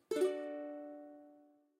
Ukulele chord #4 - Acorde de ukelele #4
Ukulele chord recorded through a condenser mic and a tube pre.
acorde, button, ukelele, string, ukulele, chord